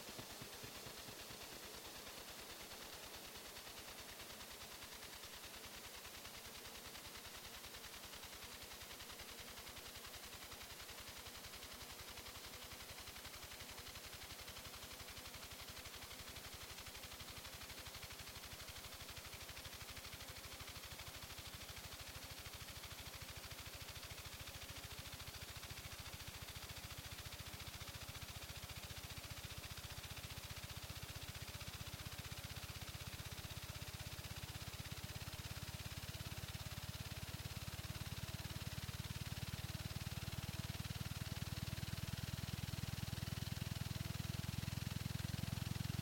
04/08/17 found tape part 3
From a microcassette I found in an old answering machine on 04/08/2017.
I rewound the tape in playback mode, and because there was a lot of unused space on the tape I got this neat little acceleration sound.
effect, microcassette, mechanical, dictation, motor, acceleration, electrical, answering-machine